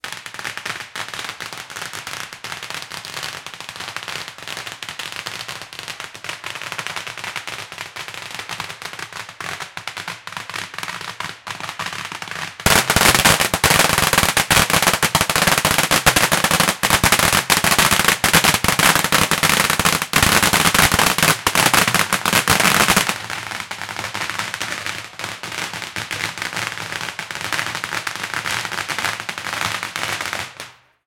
190207 new year holiday firecracker close distant
Firecrack from distance to close perspective
Ambience, Firecrack, Firework